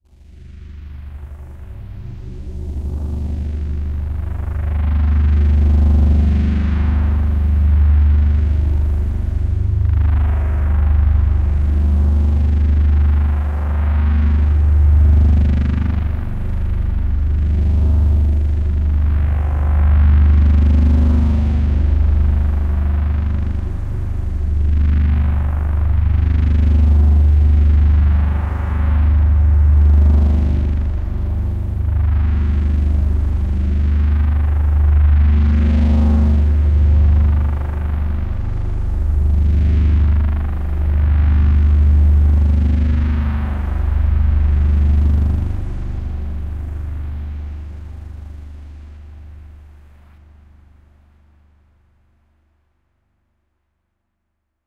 Future Ambience Background. Cinematic Ambience Background. ambience atmosphere background effect electronic future fx pad processed sci-fi sfx sound-design synth
Album: Cinematic